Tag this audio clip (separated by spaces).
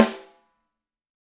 velocity fet47 lawson sample multi drum snare tama